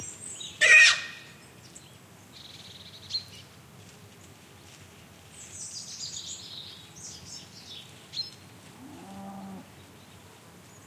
short scream (from a pig) followed by singing birds and a distant moo. Audiotechnica BP4025 stereo mic, Shure FP24 preamp, Olympus LS10 recorder. Recorded in Oak woodland somewhere near Puerto Lucia, Huelva province, S Spain